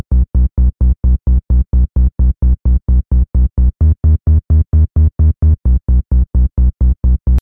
one more bassline